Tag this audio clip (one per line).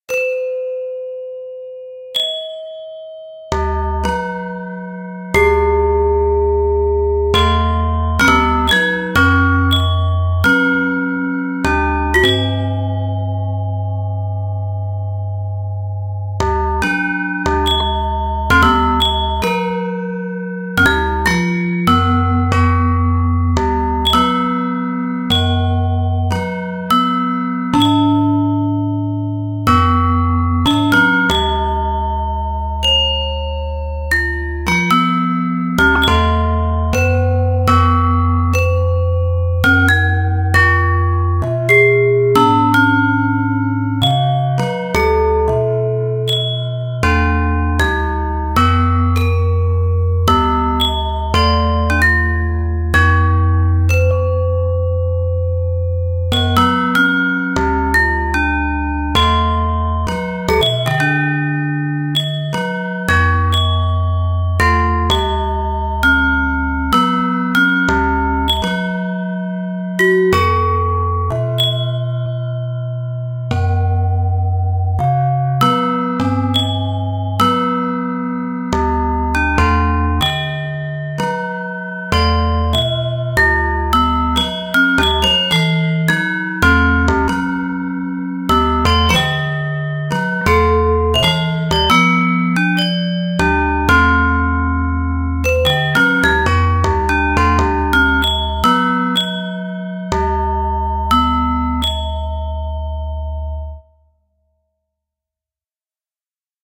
9et
atlas
balinese
calung
collider
data
experiment
gamelan
gangsa
hadron
jegogan
jublag
large
lhc
metallophone
physics
proton
sonification